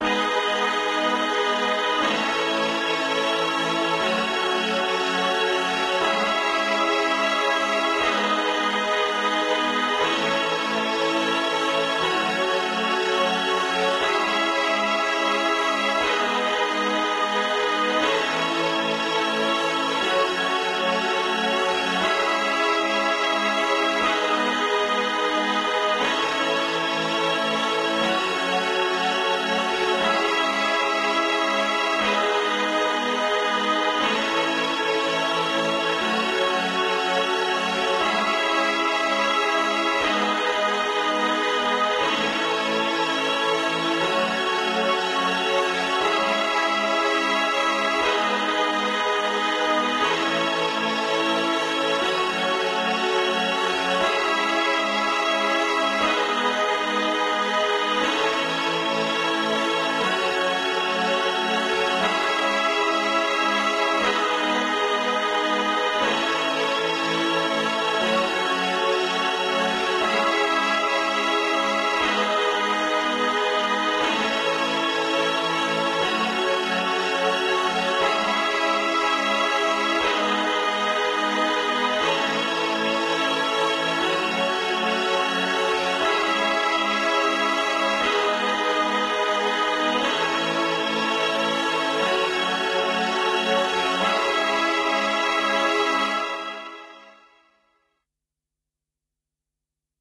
Kazoo synth loop 002 wet 120 bpm version 2
loop, 120, synthetyzer, bpm, 120bpm, kazoo, synth